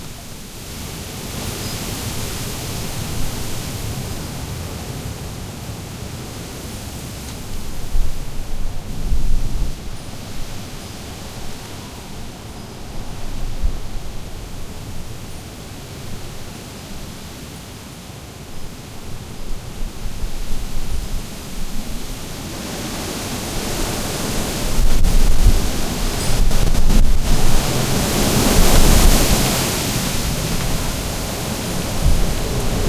wind strong gusty storm blow through crispy fall trees in alley1 wider persp
gusty, blow, alley, strong, fall, trees, wind, through, storm, crispy